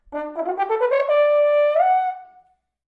horn Dmajorscale
A rapid, articulated D-major scale, followed by a slur up to high F#5. Recorded with a Zoom h4n placed about a metre behind the bell.
tongue, d, double-tongue, high-note, scale, f, 5, french-horn